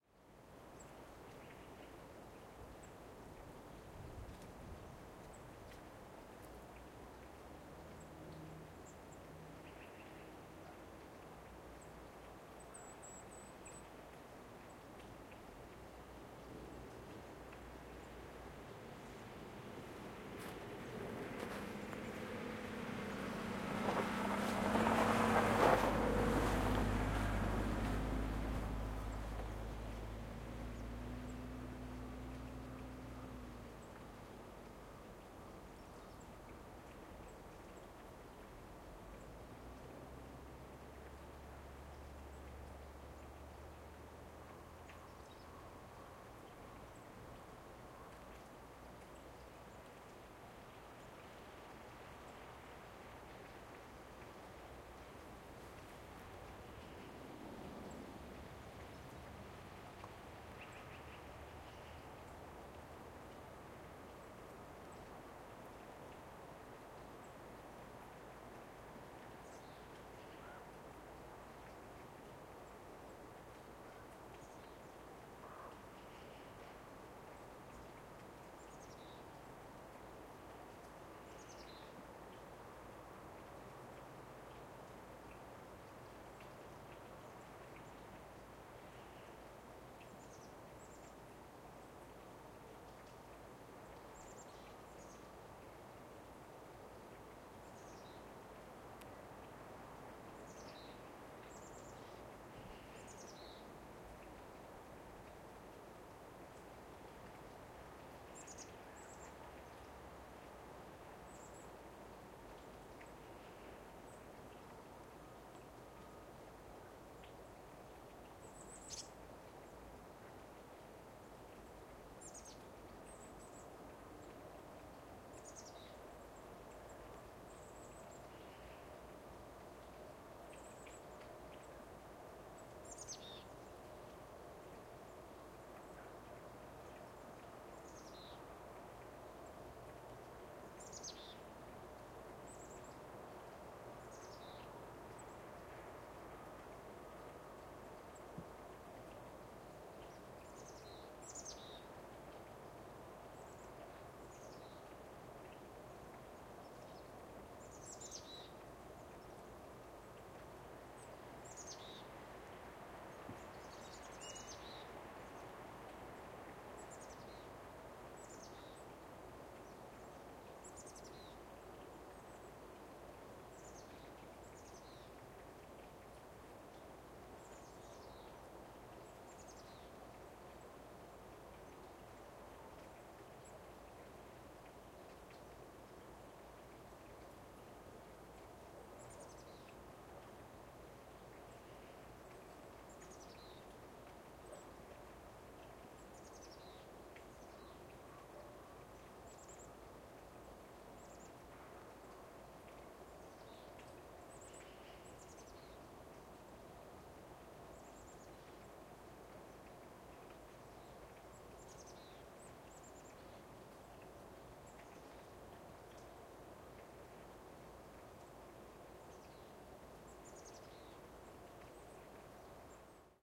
winter outdoor ambience, crow, brids, traffic, fountain
Recording of a residential winter ambience. Birds, crows, and fountain can be heard. Recorded on an H2N zoom recorder, M/S raw setting.
ambience, birds, crows, field-recording, fountain, traffic, water, winter